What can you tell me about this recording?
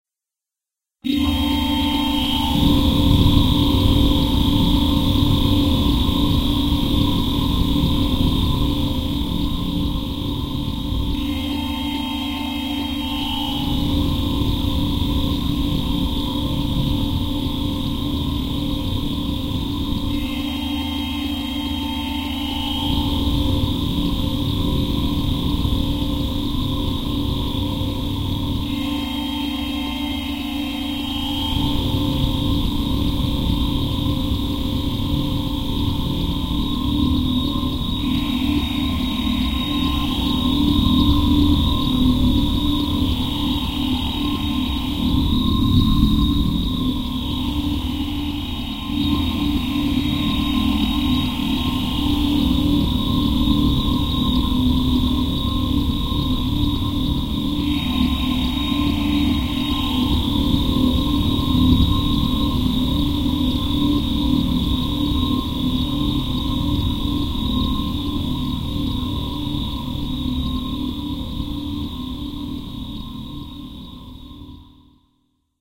Fubraculator: Another machine used to make transportation pods for the SynGlybits!